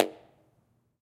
Tunnel 3 Impulse-Response low-pitch flutter echo
3, echo, flutter, Impulse-Response, low-pitch, Tunnel